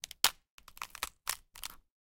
Breaking celery to emulate a sound similar to a bone snapping/breaking.